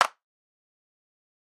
Some simple claps I recorded with an SM7B. Raw and fairly unedited. (Some gain compression used to boost the low-mid frequencies.)Great for layering on top of each other! -EG